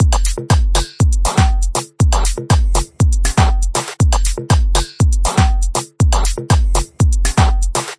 Ragga Tech Drums by DSQT 120 bpm
Simple drum & percussion loop with a raggaeton kind of swing.
electronic, simple, percussion, beat, house, drums, loop, 120bpm, raggaeton